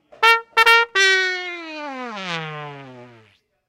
Trumpet sound recorded for a children's show. Recorded with an SM 57, using Logic Pro.
fall, bugle, funny, call, trumpet